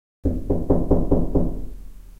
Knocking sound with little post production